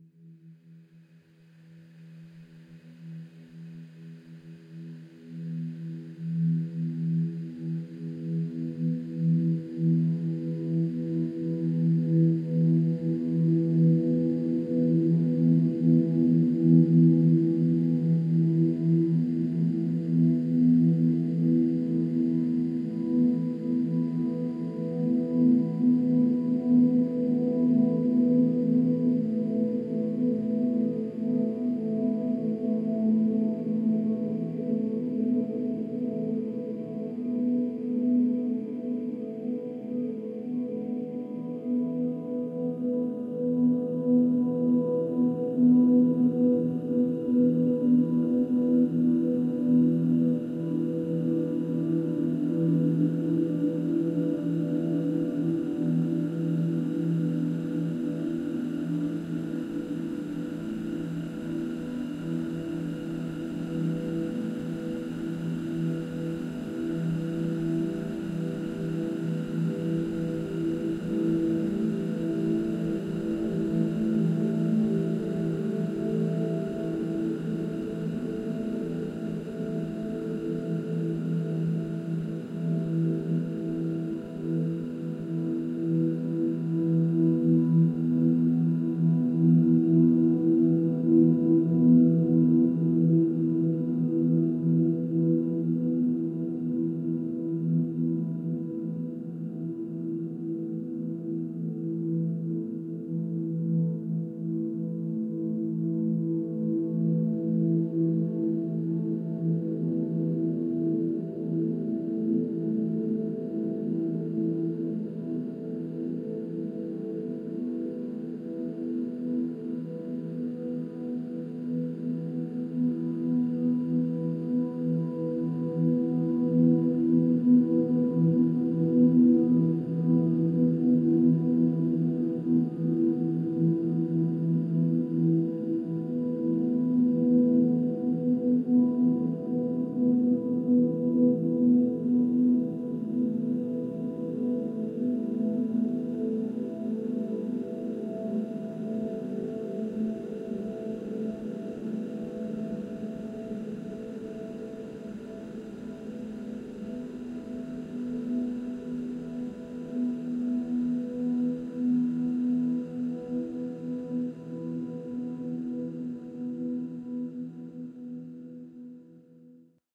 Shadow Maker - Library
So go ahead and use it in your projects! I am thrilled to hear from you if you can use it in something. I hope you find this atmospheric evil soundscape inspiring!
Made mostly in Audacity.
Evil, Cinematic, Creepy, Dark, Background